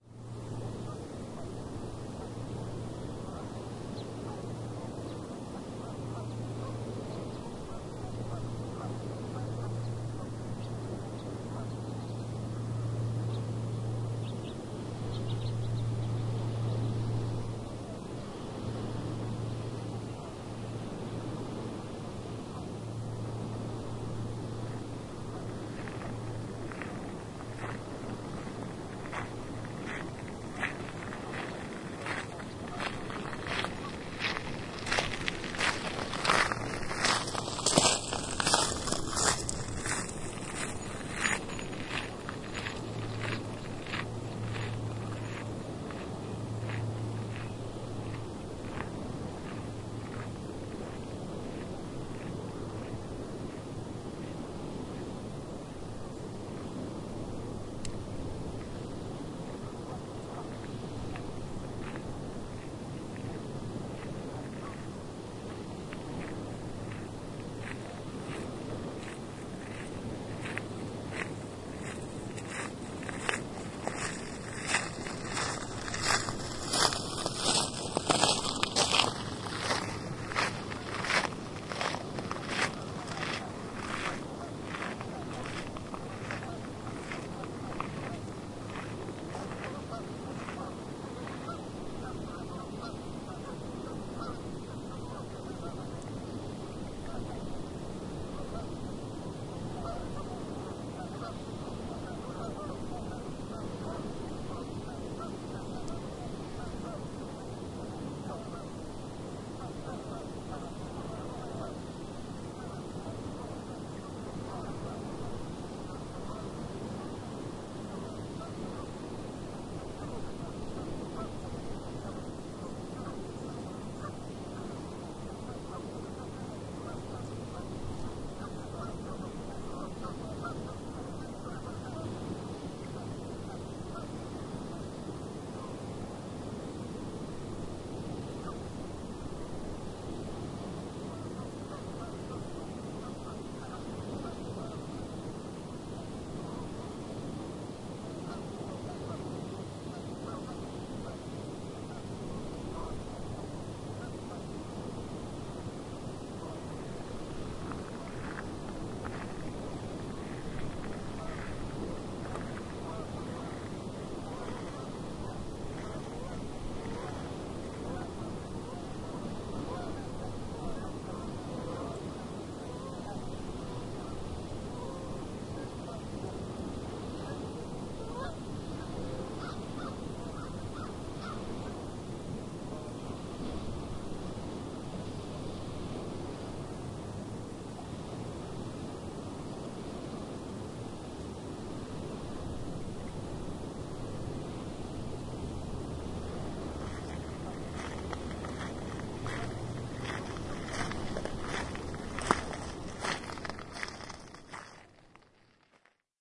Recorded on Saturday, February 27, 2010 in the early afternoon at Tillinghast Farm in the wetland area between the grassy hill and the beach. Sounds of geese and other birds can be heard as well as my walking on a gravel path (still wet from melted snow). Used the Marantz PMD661 with built-in microphones. Used my winter hat as a makeshift windscreen.
geese, gravel, new-england, rhode-island, walking, wetland